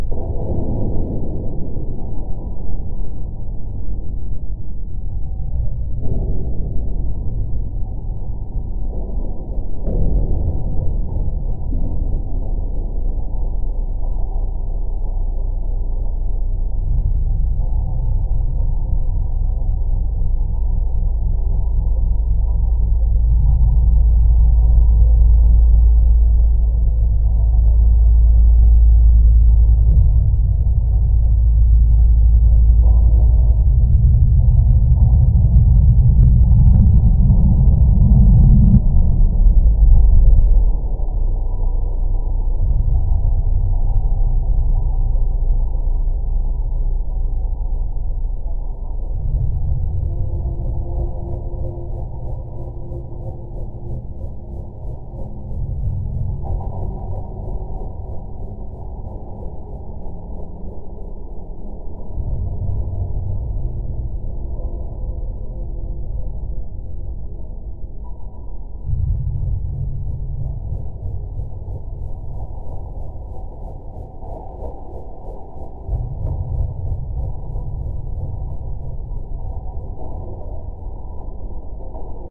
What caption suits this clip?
Ambient level / location sound 3
Level sound, ambient.
horror, experimental, sinister, Gothic, disgusting, suspense, pad, fear, background, stalker, anxious, dying, background-sound, loading, ambiance, light, nature, soundscape, scary, creepy, location, drone, evolving, ambient, haunted, level